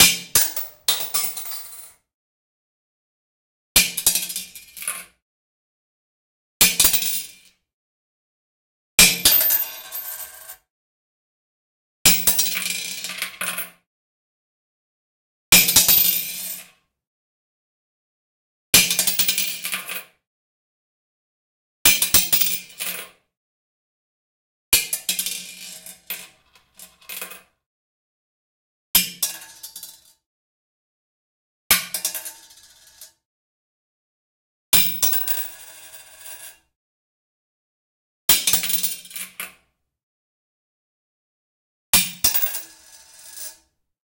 Falling Coins
cash, coins, fallen, money, picking